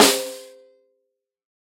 SD13x03-Pearl-MP,TSn-RS-v16
A 1-shot sample taken of a 13-inch diameter, 3-inch deep Pearl brass piccolo snare drum, recorded with a Shure SM-58 close-mic on the batter head, an MXL 603 close-mic on the bottom (snare side) head and two Peavey electret condenser microphones in an XY pair. The drum was fitted with an Evans G Plus (hazy) head on top and a Remo hazy ambassador snare head on bottom.
Notes for samples in this pack:
Tuning:
VLP = Very Low Pitch
LP = Low Pitch
MLP = Medium-Low Pitch
MP = Medium Pitch
MHP = Medium-High Pitch
HP = High Pitch
VHP = Very High Pitch
Playing style:
CS = Cross Stick Strike (Shank of stick strikes the rim while the butt of the stick rests on the head)
HdC = Head-Center Strike
HdE = Head-Edge Strike
RS = Rimshot (Simultaneous head and rim) Strike
Rm = Rim Strike
Snare Strainer settings: